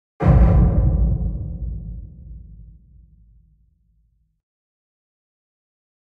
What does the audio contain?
Deep Hit
Only one simple hit that I made on Fl Studio 10, adding to a Kick a lot of reverb and getting off the dry mode. I hope you like it ;)
Suspense, Deep, Hit